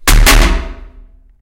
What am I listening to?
closing two lid toilet
Closing a toilet lid.